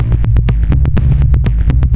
Kick seq 1
another kick loop, pretty dark.
techno, industrial, loop